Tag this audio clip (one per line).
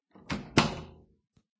close
door
wood